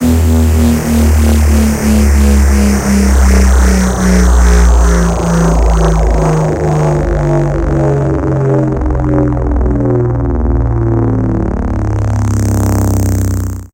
A distorted falling reese. Reminds me of some sort of malfunction. Made with Image-Line Harmor and its built-in distortion and unison modules. (And other random FX.)